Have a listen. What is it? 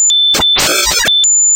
New New Empyrean
healthy, ringtone, robot, ambient, rain, bleep